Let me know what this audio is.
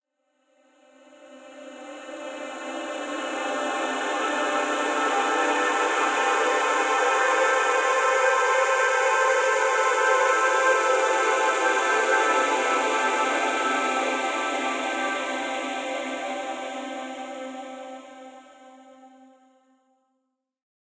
An ethereal sound made by processing a acoustic & synthetic sounds.